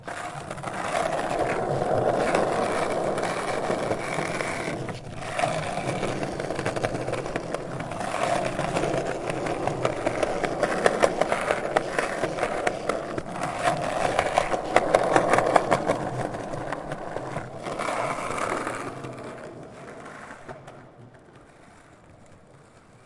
Long-Close 6
The sound of skate boards that i take for my video project "Scate Girls".
And I never use it. So may be it was made for you guys ))
Here Girls ride from hill one by one.